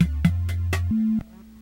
Ah Gee..... These are random samples I recorder and am such a lazy I don't want to sort them out....
1 - Could be my Modified Boss DS-1 Distortion Pedal (I call it the Violent DS - 1) (w/ 3 extra Capacitors and a transistor or two) Going throught it is a Boss DR 550
2 - A yamaha Portasound PSS - 270 which I cut The FM Synth Traces too Via Switch (that was a pain in my ass also!)
3 - A very Scary leap frog kids toy named professor quigly.
4 - A speak and math.......
5 - Sum yamaha thingy I don't know I just call it my Raver Machine...... It looks kinda like a cool t.v.